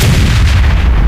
Canon fire
fire, gun, effect, canon, sound